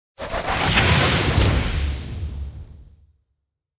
A short magic casting sound effect designed by myself. Enjoy.
dynamic, blast, shockwave, heavy, powerful, Magic, Spell, Destruction